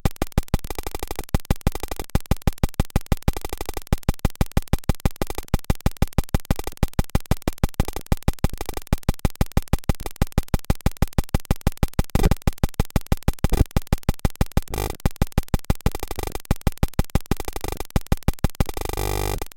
APC-GlitchBeatesque
noise, diy, APC, Lo-Fi, drone, Atari-Punk-Console, glitch